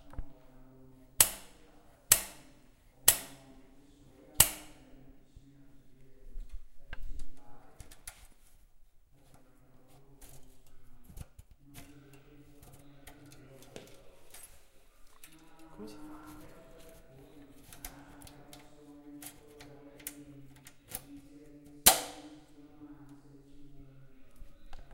carter low001
Human Bike Sound Archive.
Another take of bicycle metal components in TBS studio.
bell, bicycle, bike, cycle, horn, mechanic, metallic